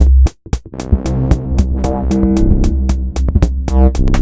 Attack loop 114 bpm-17
It is a one measure 4/4 drumloop at 114 bpm, created with the Waldorf Attack VSTi within Cubase SX.
The loop has a low tempo more experimental electro feel with some
expressive bass sounds, most of them having a pitch of C. The drumloop
for loops 10 till 19 is always the same. The variation is in the bass.
Loops 18 and 19 contain the drums only, where 09 is the most stripped
version of the two. Mastering (EQ, Stereo Enhancer, Multi-Band expand/compress/limit, dither, fades at start and/or end) done within Wavelab.
bass, electro, drumloop, 114-bpm